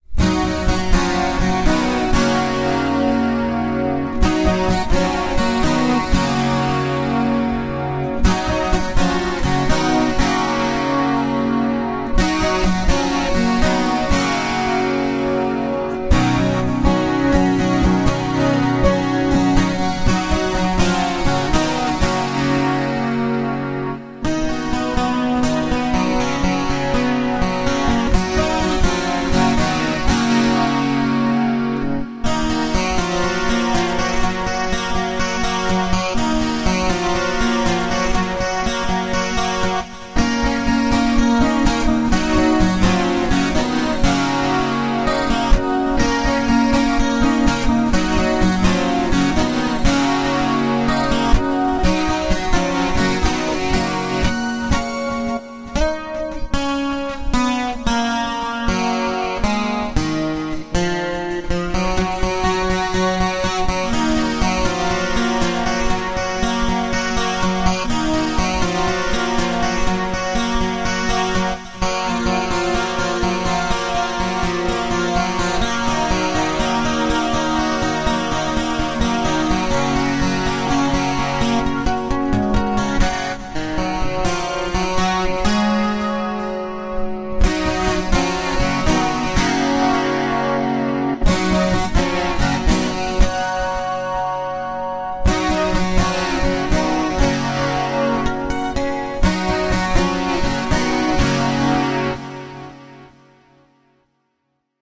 12 String Waves
A short melody with chords on a 12 string acoustic guitar.
12, Acoustic, Guitar, String